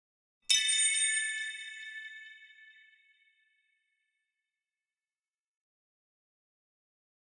Sound of an idea coming to you